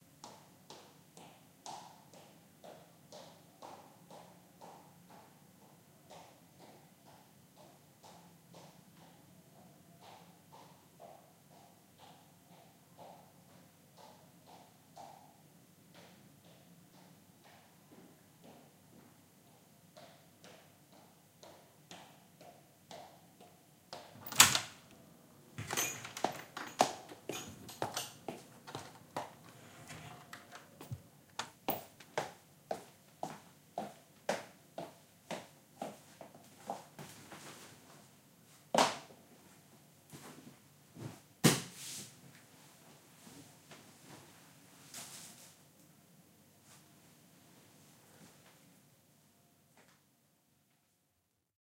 Bunyi no.12 orang buka pintu ver2
Somebody open door
door, open, opening, doors